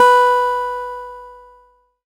Sampling of my electro acoustic guitar Sherwood SH887 three octaves and five velocity levels
acoustic, guitar